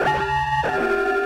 Sounds like a robot arm.
industrial, loop, robot